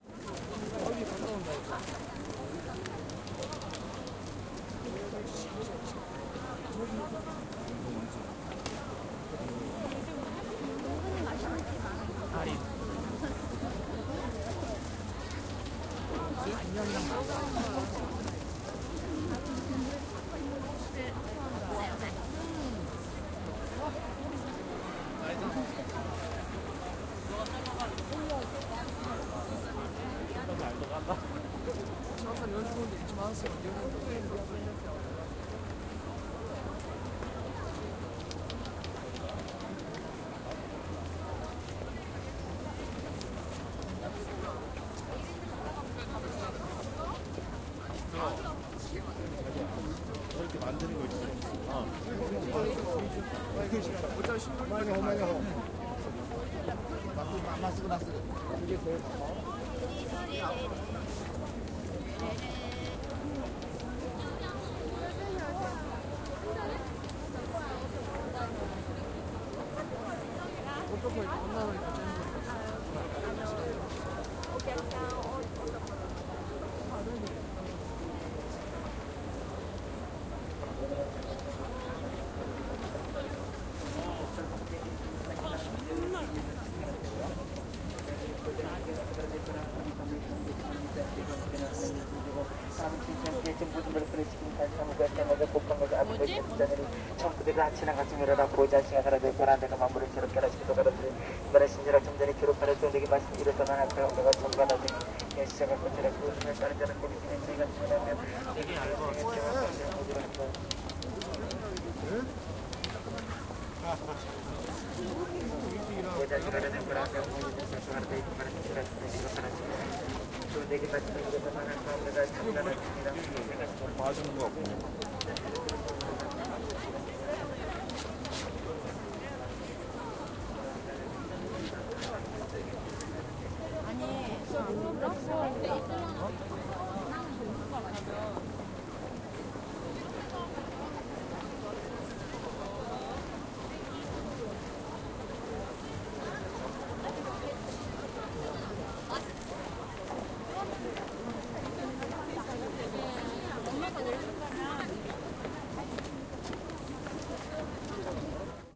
0172 People busy street
People in a busy street. Talking Korean from a speaker.
20120212